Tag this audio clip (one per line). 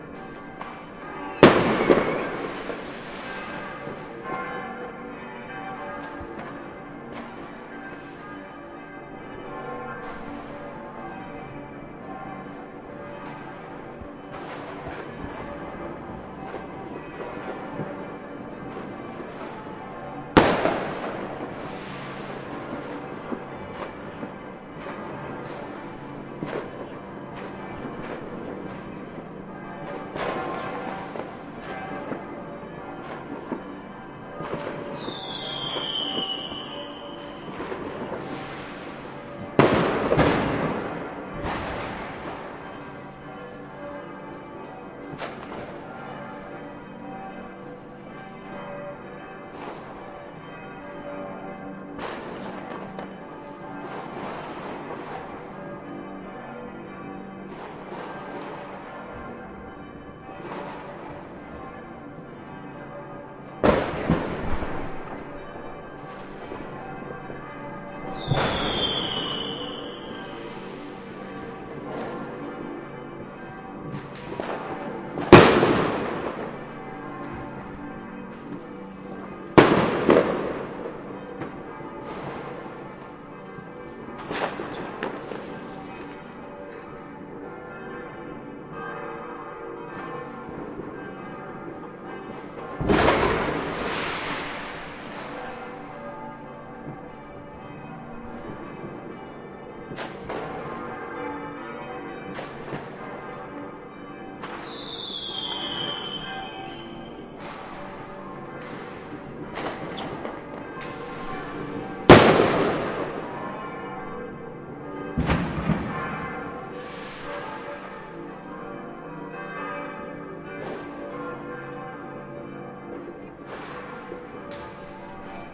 ambiance
ambience
ambient
atmo
atmos
atmosphere
background
background-sound
bells
church
field-recording
fireworks
midnight
new-years-eve
outdoor
outdoors
people
ringing
soundscape